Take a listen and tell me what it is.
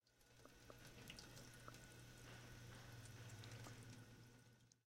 Water Fountain
splash, fountain, water